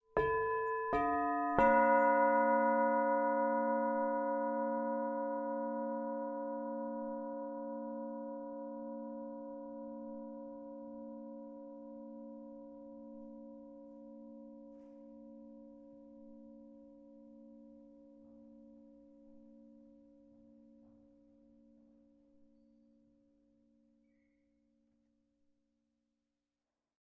Church bells
bells, cathedral